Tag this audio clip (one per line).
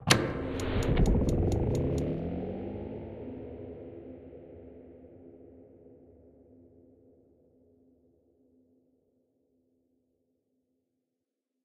machine
on-off
artificial
fx
off
science-fiction
sfx
turn
sci-fi